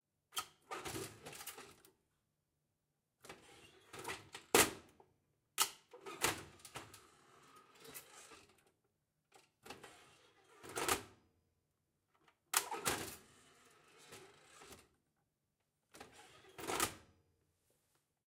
Old Electric Stove, Oven Door Open and Close with Safety Latch, Distant
Sounds recorded from an old electric stove, metal hinges, door and switches.
cook, cooking, door, fx, house, household, kitchen, metal, oven, sfx, sound-effect, stove, switch